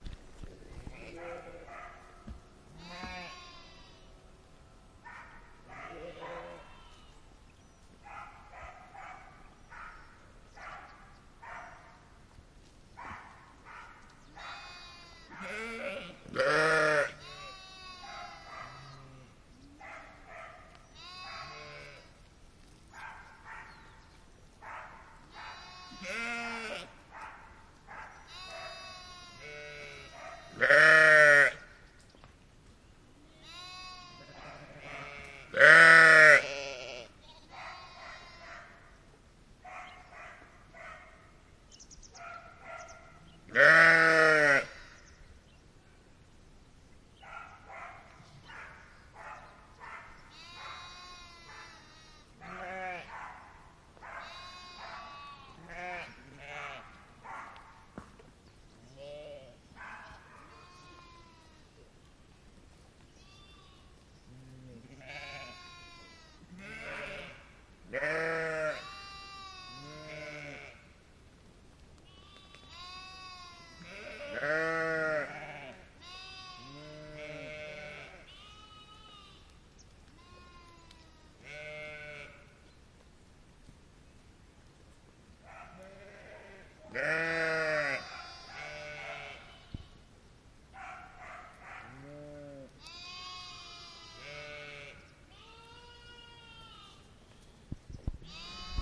A farm in Portalegre, Portugal. You can hear sheeps, a dog in the distance, birds...
Recorded with a Zoom H1n.